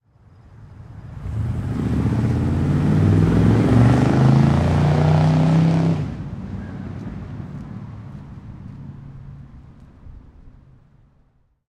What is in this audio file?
auto performance car pull away fast take off big continuous rev